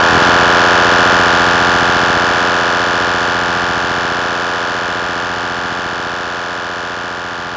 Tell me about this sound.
This is a lead synth sound I made for the XS24 on the Nord Modular G2 and Universal Audio UAD emulations of the Neve EQs, LN1176 Limiter, 88RS, Fairchild, and Pultec EQs. Also used the Joe Meek EQ from protools.
nord, synth, goa, darkpsy, uad, fm, protools, g2, modular, lead, psytrance